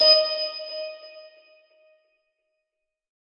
MSfxP Sound 250
Music/sound effect constructive kit.
600 sounds total in this pack designed for whatever you're imagination can do.
You do not have my permission to upload my sounds standalone on any other website unless its a remix and its uploaded here.
effect, synth